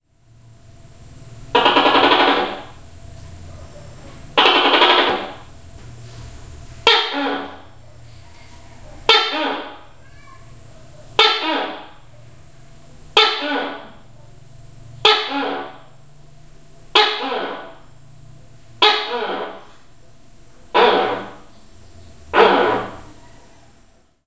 Recorded with Canon S5IS in our CR (comfort room). He lives there because of the good acoustics I'm sure. If only we could teach him to poop in the toilet instead of the shower stall. We live in Puerto Princesa, Barangay San Jose, Palawan, Philippines.